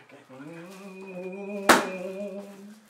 FX - rare